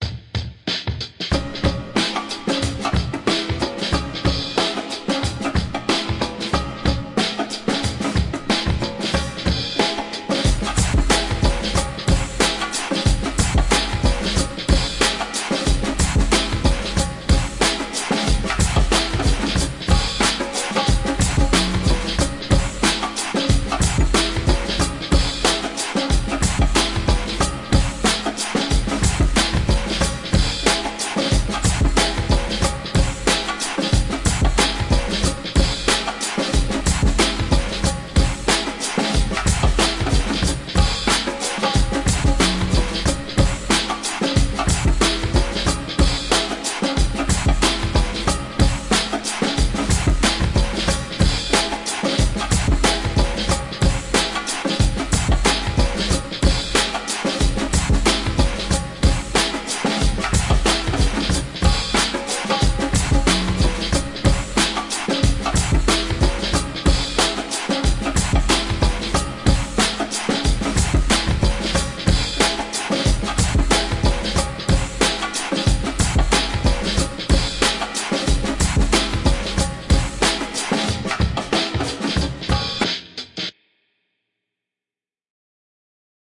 cool music made by me 7
free, song, music